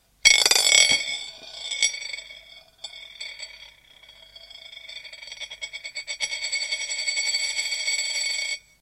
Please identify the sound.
rotation2dpf1897
Coins from some countries spin on a plate. Interesting to see the differences.
This one was 2 pfennig from Germany, marked 1897.